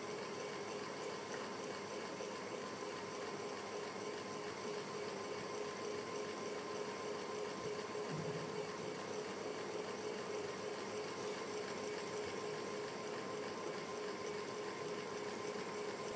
Ceiling Fan (Indoor)
An unstable ceiling fan running at a low speed in my living room.
Technical Details:
Duration: 0:00:16.16
ceilingfan, fan, indoor, slow, speed, unstable